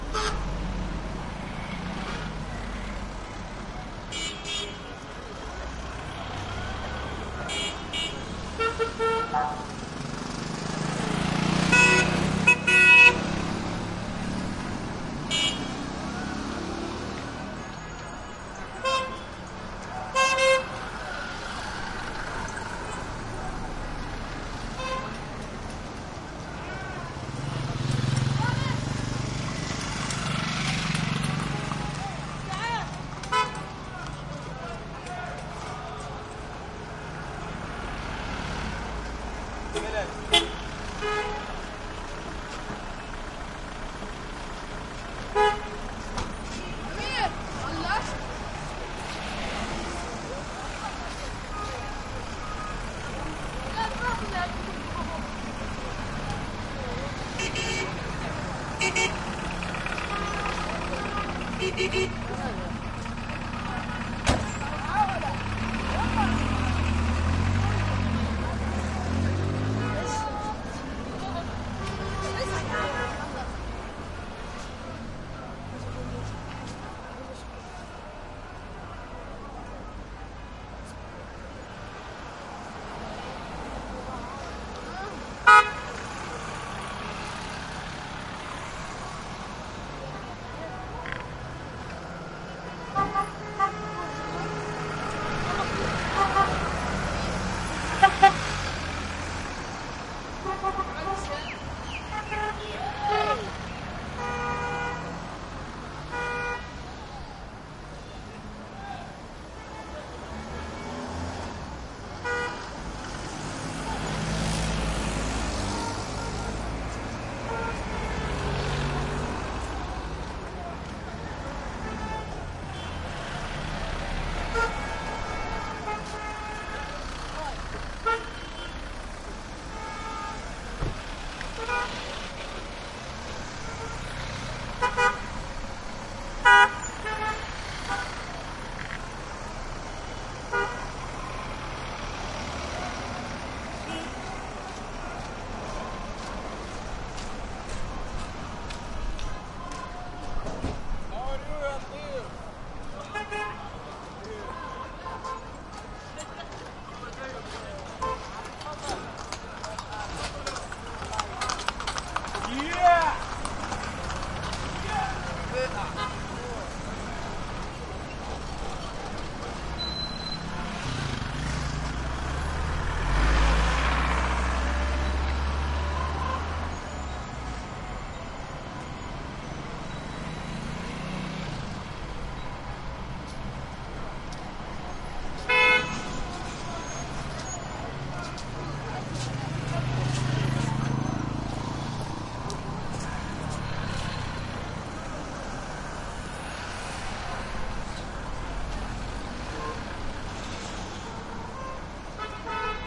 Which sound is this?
traffic medium Middle East busy street near market throaty cars horn honks arabic voices2 +horse trot by near end Gaza 2016
traffic, city, East, medium, Middle, street, busy